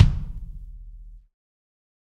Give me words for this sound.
Kick Of God Wet 008
set, kick, god, realistic, pack, kit, drum, drumset